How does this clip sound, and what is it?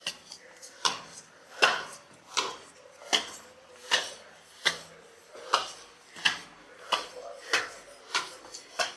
pasos subiendo escaleras
sonido pasos escalera grabado en casa
escalera, principal